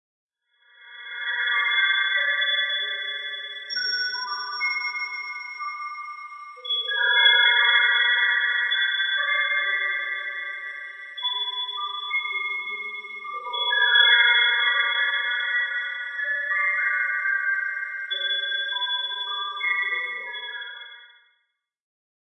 Wind Chimes
A high-pitched fantasy-inspired soundscape. I hope you like it!
If you want, you can always buy me a coffee. Thanks!
ambiance, soundscape, atmosphere, noise